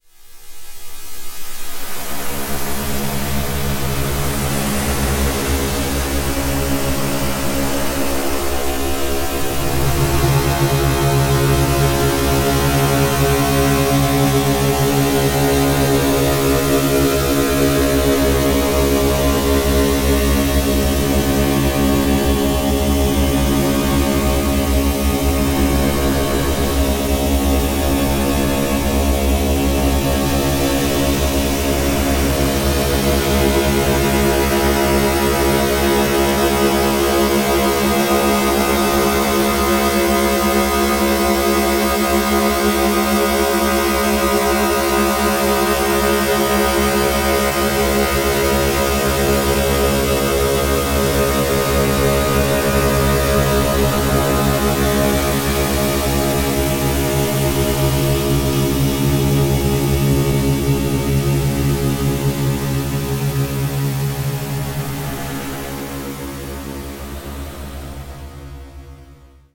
Image Sonification 008
Image exported to Raw format with the Photoshop application, imported to audacity which has been modified.
audacity, audification, effect, hard-sync, image-sound, raw